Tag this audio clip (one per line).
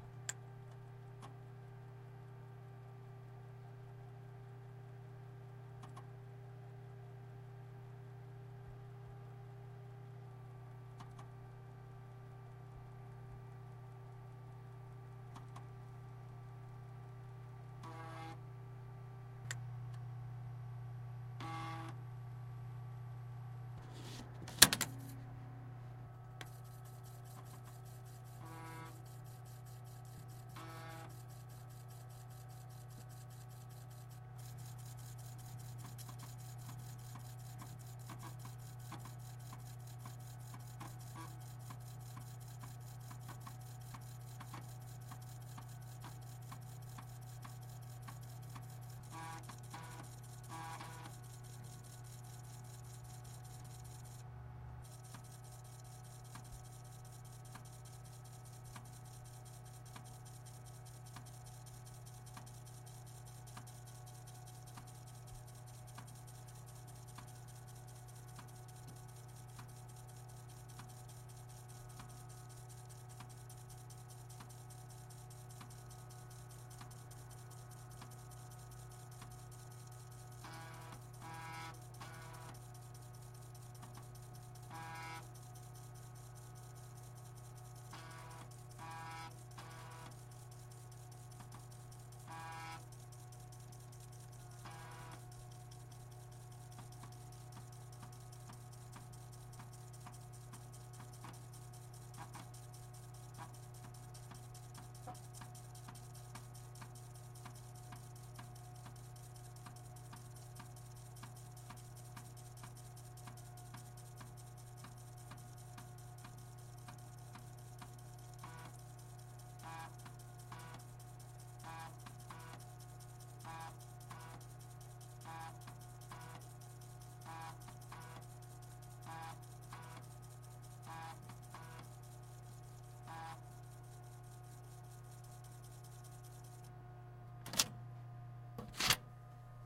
3
5
close
computer